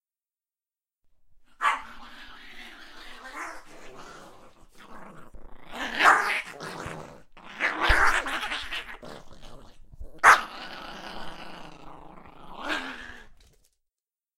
dog attack
angry, barking, CZ, Czech, dog, growl, growling, Panska